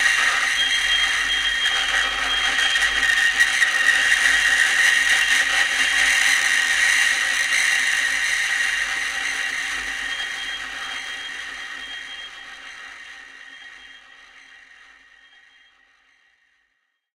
no escape
recordings of a grand piano, undergoing abuse with dry ice on the strings
dry, scratch, torture, ice, screech, abuse, piano